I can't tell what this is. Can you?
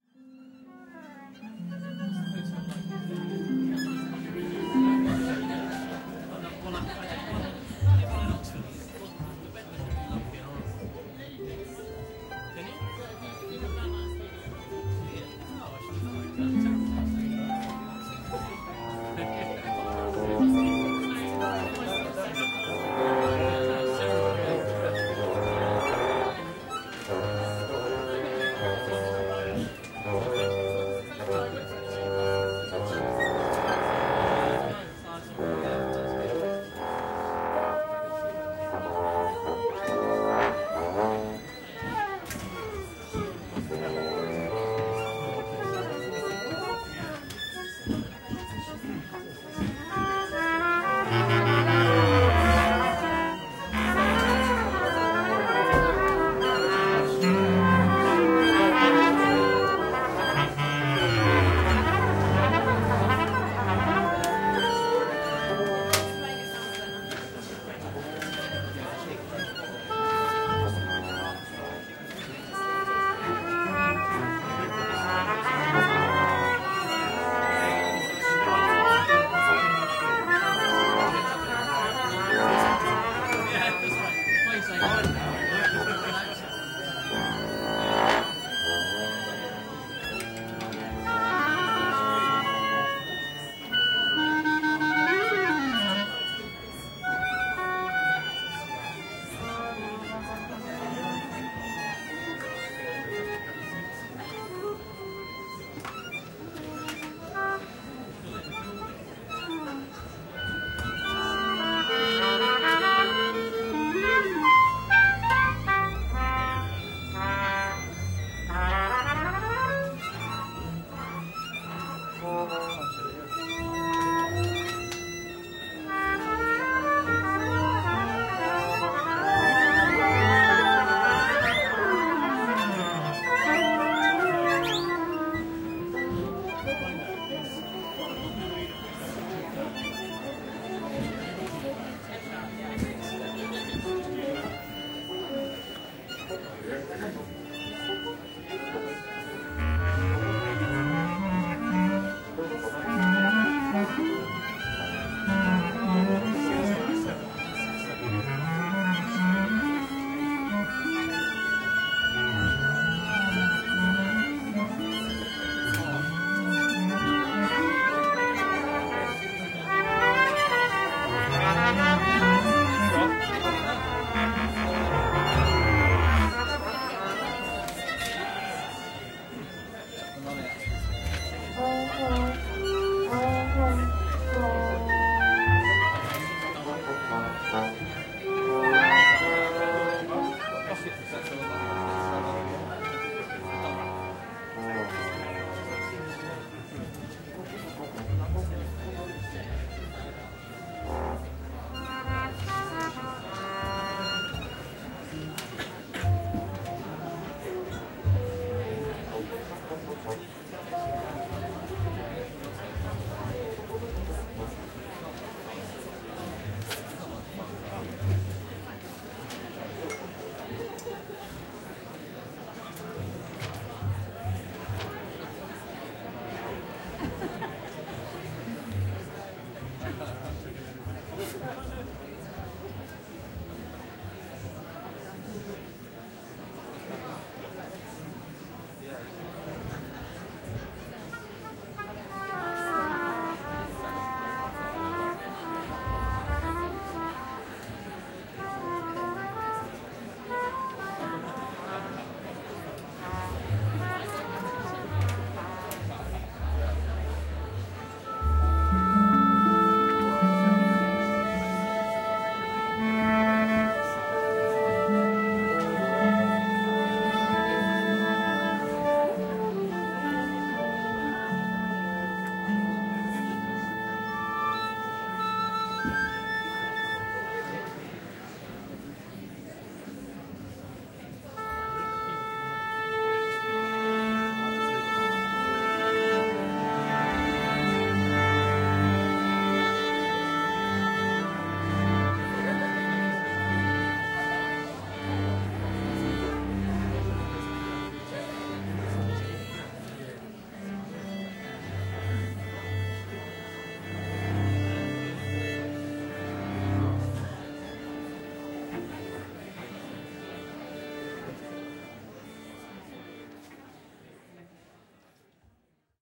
Orchestra Pit Perspective Intrument Tinkering 02
These are a collection of sounds I took from a recent opera recording. For obvious reasons I could not upload any of the actual performance but I have here some recordings of tuning and audience from the microphones placed in the pit.
With placements limited and under instruction not to have any visible, I had to place these fairly discreetly without suspending the mic's. I used two omni DPA 4090 as a spaced pair around 3 foot above the conductor, and an AKG 414 on a cardioid pickup to the rear.
instruments, locationsound, orchestra, theatre